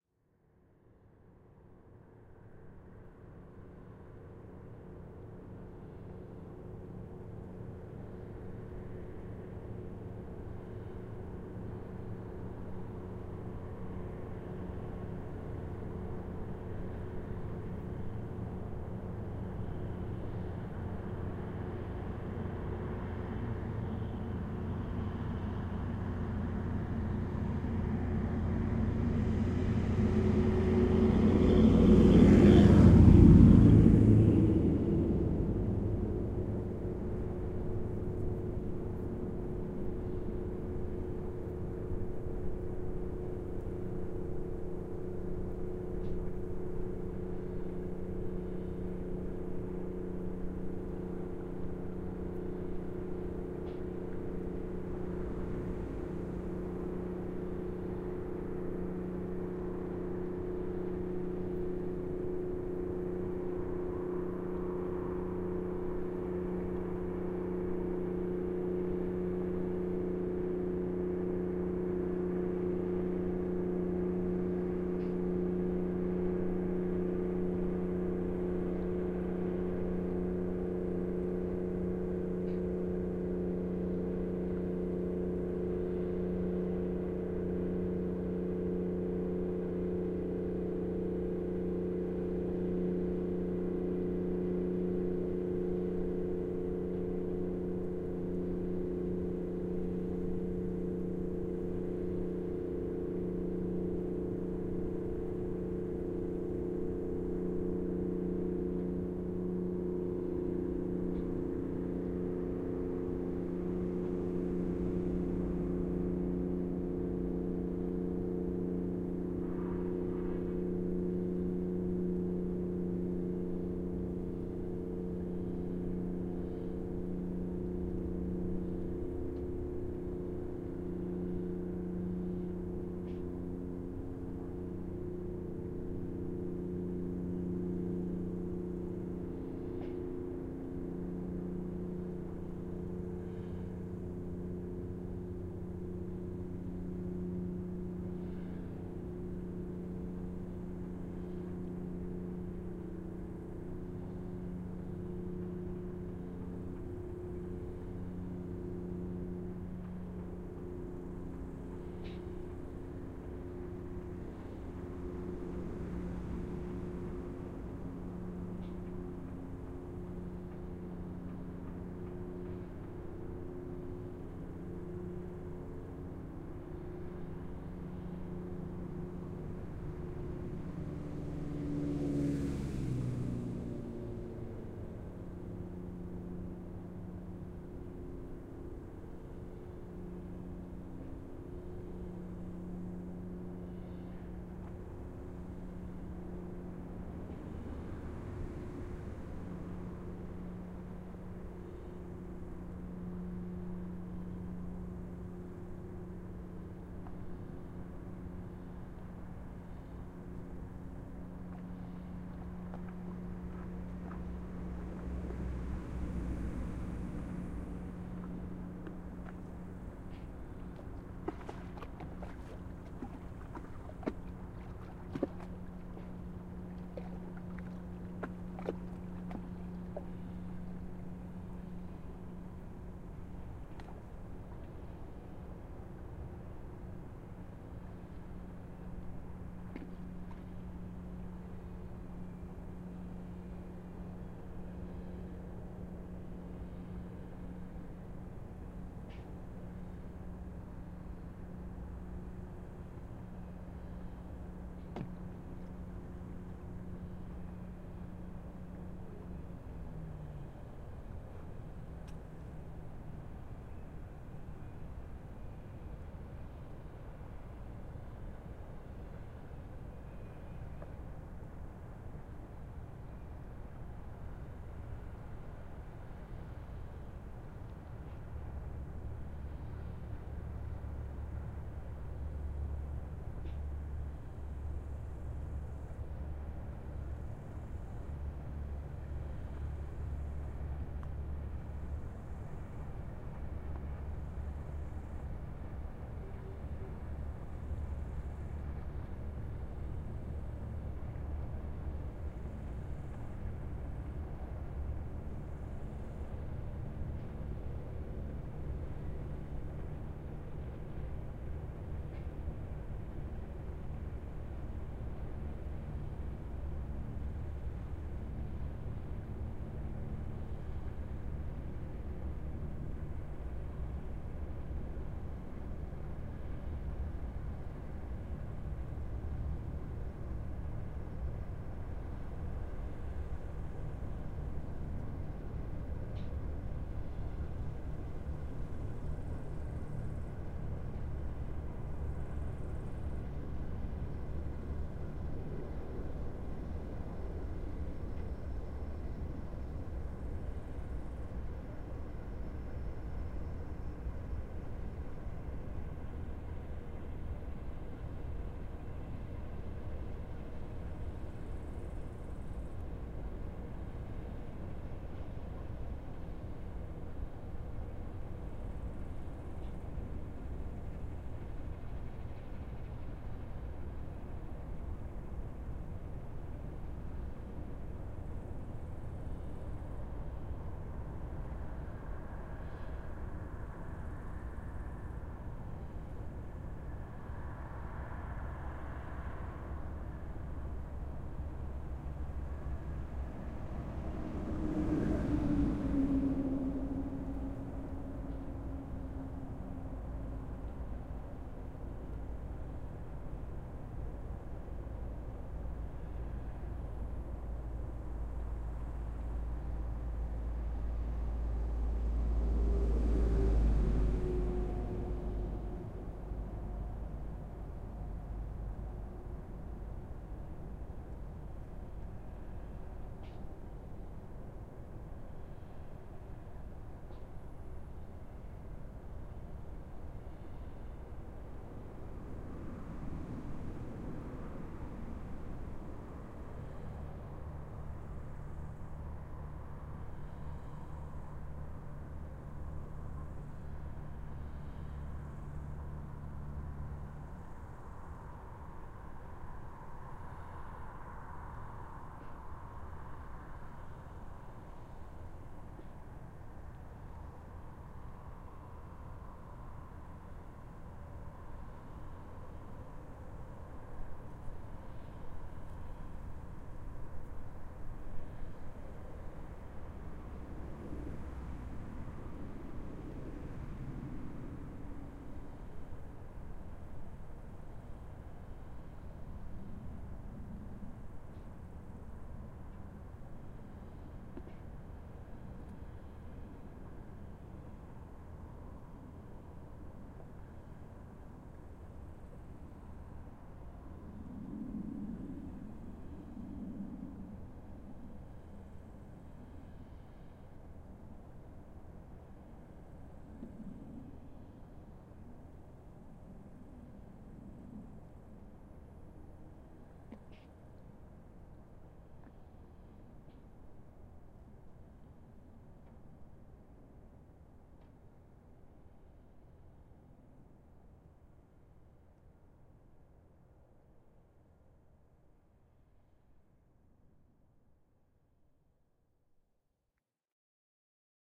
Aan de waterkant ter hoogte van Zuidledeplein
An atmospheric recording of life along the canal in Terdonk. Boats pass by, the occasional lorry passes by, the water hits the quay, summer insects chirp and a distant ferry drones. Recorded in August 2014 on a Roland R-26 with built-in omni and xy microphones then mixed down to stereo.
atmosphere, cricket, summer